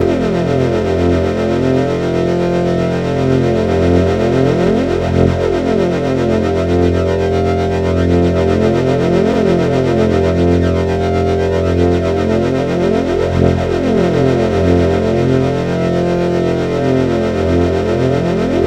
Mono tron bike engine
flange, sci-fi